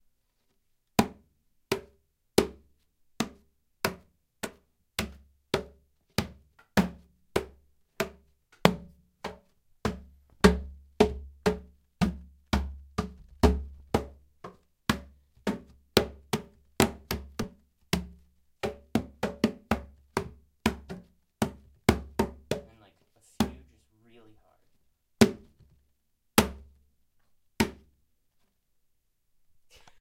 slapping a medium-sized wooden box. recorded with a TASCAM DR-07 mk2. unprocessed.